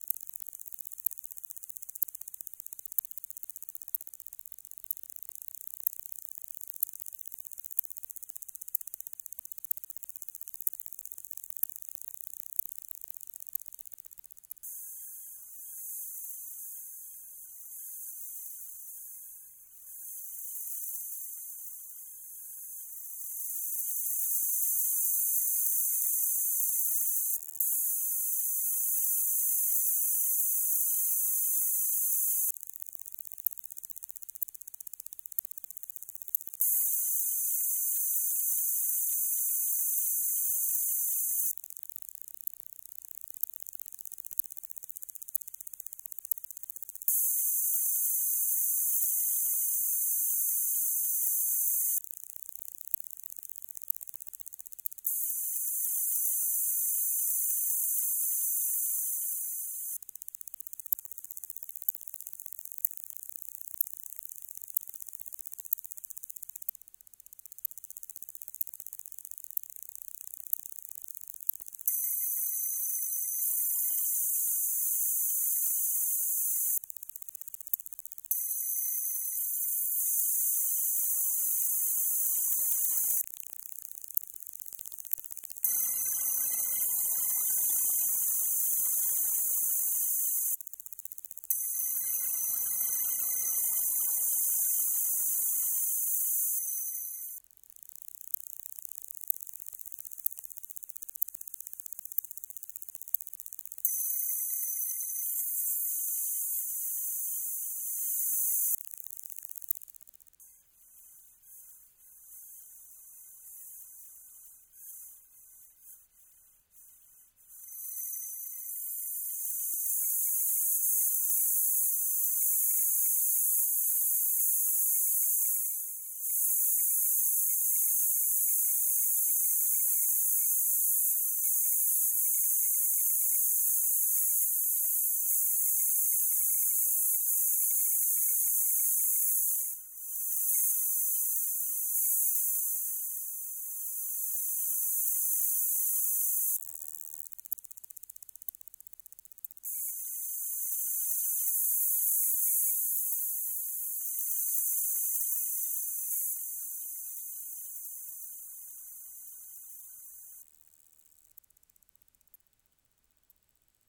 Ratón inalámbrico
field-recording, electromagnetic